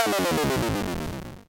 Simple retro video game sound effects created using the amazing, free ChipTone tool.
For this pack I selected the LOSE generator as a starting point.
It's always nice to hear back from you.
What projects did you use these sounds for?